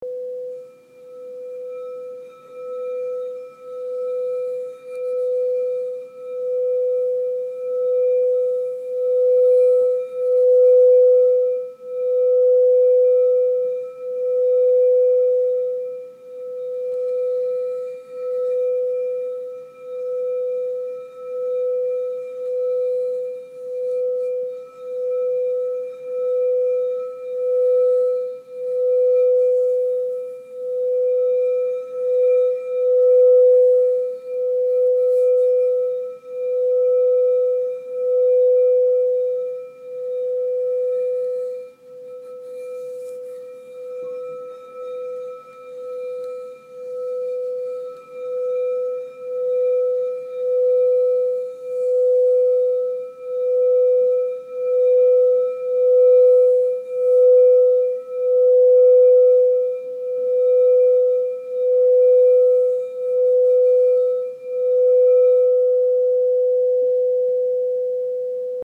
Aud 8” crystal bowl
8” crystal bowl tap
bowl, crystal, tap